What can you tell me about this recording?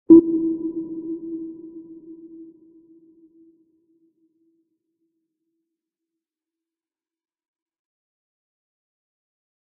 Sub - Sub Low
Low note from submarine sonar
high, under-water, sub, processed, submarine, fx, sonar, water, aquatic